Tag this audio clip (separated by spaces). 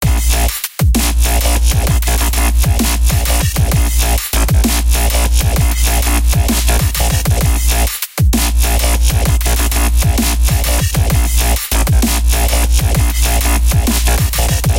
Djzin loops Xin fl-Studio grind synth electronic wobble techno electro low sub bass loop dubstep